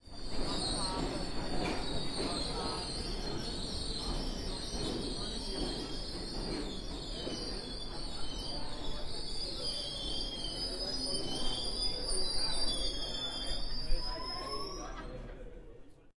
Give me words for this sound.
NYC Subway train, screeching to a halt
NYC_Subway train, screeching to a halt
halt, subway, NYC, screeching, train